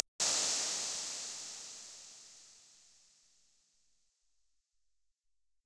Klick Verbs-36
This is a random synthesized click-sound followed by a reverb with 200 ms pre-delay. I used Cubase RoomWorks and RoomWorks SE for the reverb, Synth 1 for the click and various plugins to master the samples a little. Still they sound pretty unprocessed so you can edit them to fit your needs.
crash, digital, downlifter, impuls-response, roomworks